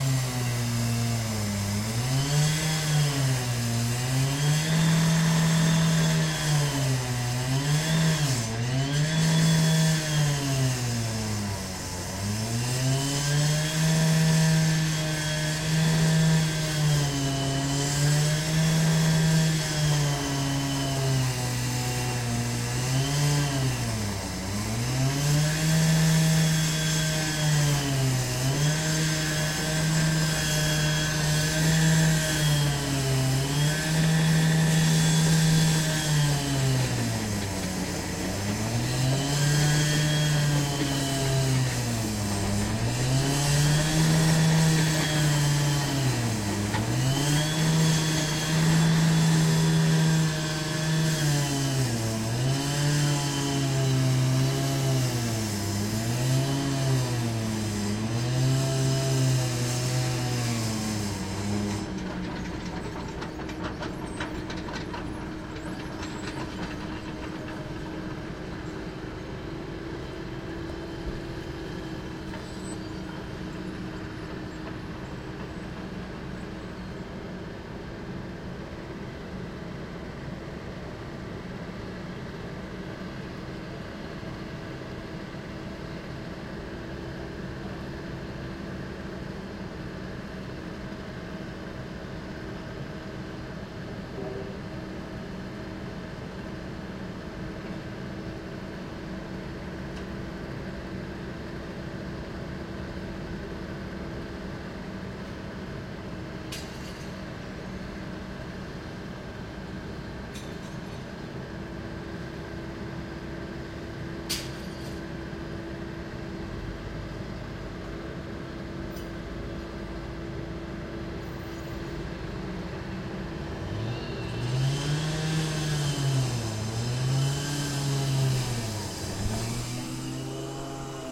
concrete saw and gennie ashford
what more can you ask for? A beautiful, clear, quiet, hot summer day and then all of a sudden some workmen are digging up the road. Sounds of a concrete saw and a generator.
construction, roadworks, saw, generator